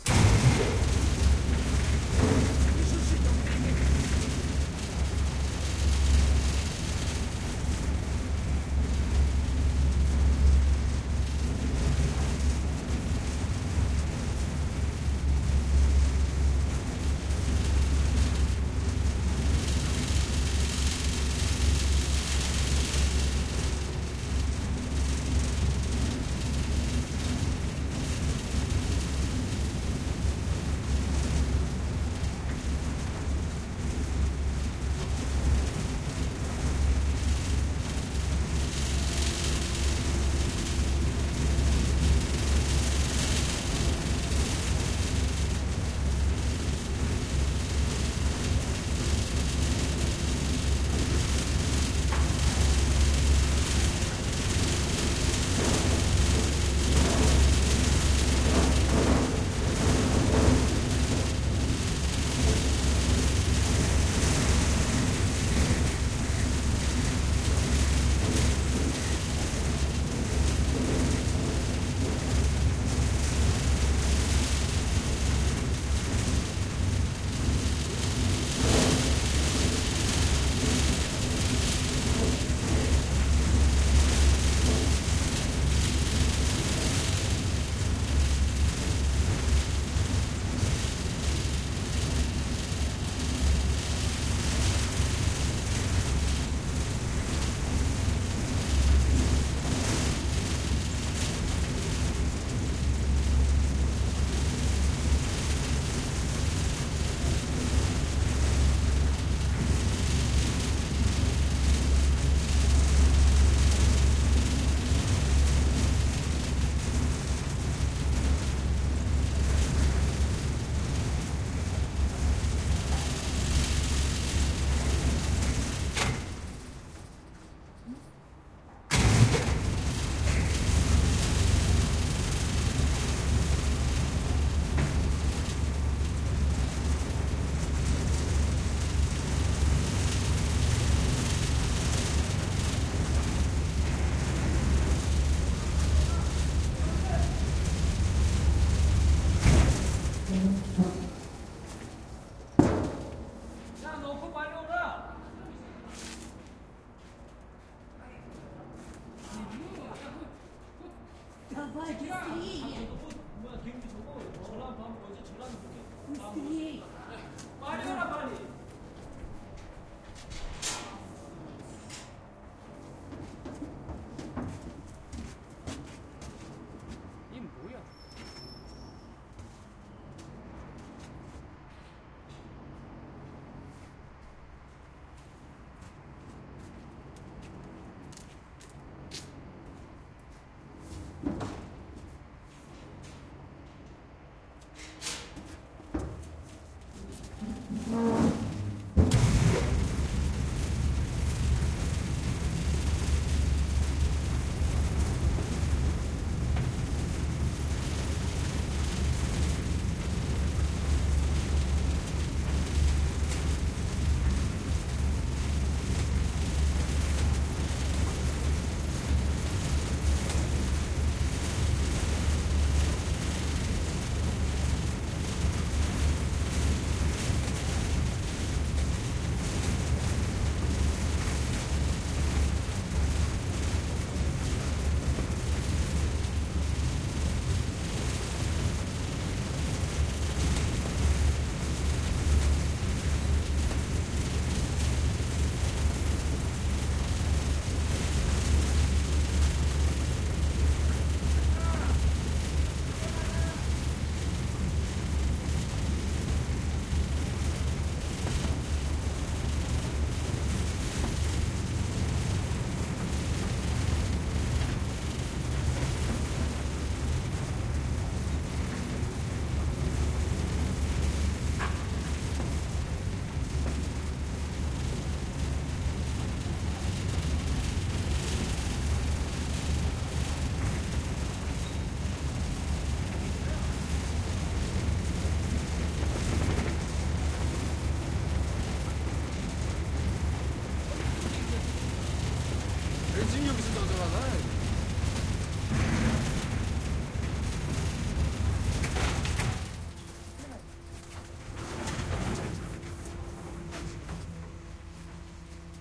Riding an elevator at a construction site in Moscow, up & down (stereo MS decoded)
Riding an workers utility elevator at a huge construction site in Moscow, up & down, with a stop to let in some workers. A bit of elevator-operator's & worker talk is also there.
MS stereo recording made with Sennheiser MKH-418S & Sound Devices 788T - decoded to plain stereo.